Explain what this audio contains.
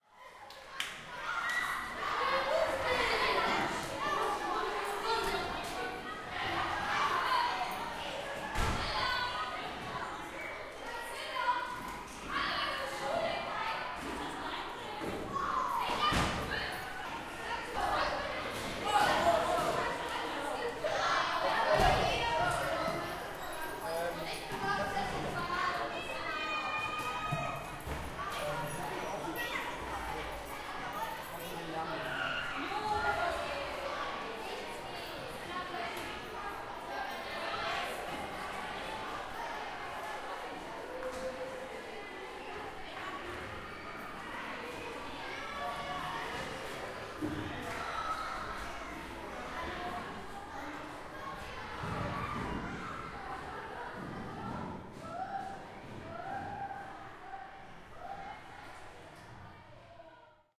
110606 children at school
Crowd of younger pupils (10 to 12 years old)leaving a school building for break in the schoolyard. Zoom H4n
laugh, children, loud, child, crowd